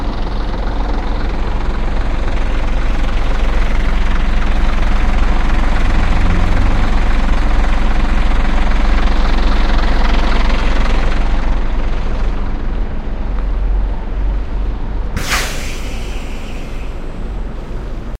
idling.bus.engine.20050813
idle bus engine, near the end makes a nice noise I can only describe as 'pressure being released'(any hint?). Recorded with cheap Aiwa omni stereo mic and iRiver iHP120 /motor de autobus al ralenti, haciendo al final un ruido muy curioso, como de presion que se libera (?)